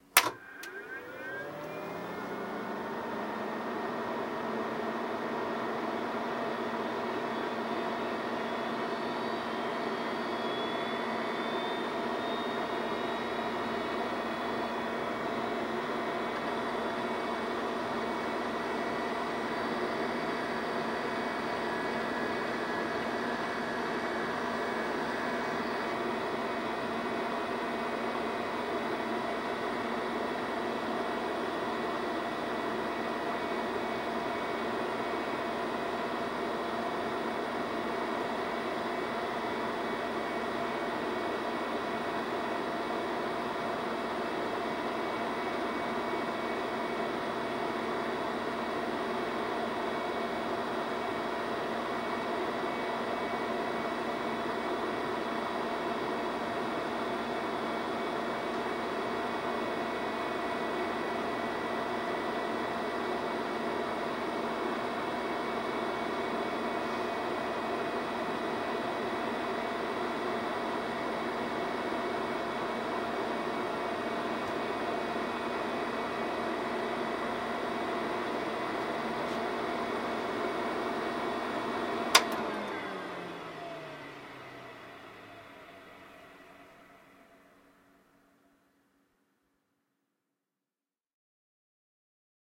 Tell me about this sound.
Fairlight CMI
A recording of a Fairlight Computer Musical Instrument powering on, running and then powering off.
The Farilight is from the mid 80's I believe, hence why it's so loud. I tried to get the microphones as close in there as possible to really get the mechanical feel of the computer. I think there's lots of potential for this sound. Filter it like crazy to get some nice tones out of it! Slow it down and you've got the internal hum of an airplane. Be creative! <3
Again, I have this recording in B-format too.
computer, mechanical, wind-down